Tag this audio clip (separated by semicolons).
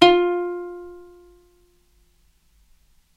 sample; ukulele